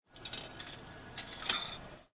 Coin into Slot
Foley recording of a coin being dropped into the insert slot of a money changer. Recorded in a subway station in Gwangju, South Korea.
coin, field-recording, foley, money, sound-effect